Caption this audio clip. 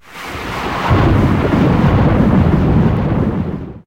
In this sound so I used a "fad cross out" to decrease the amplitude at the end, an echo effect and melt in the opening and finally normalize to -0.5 db.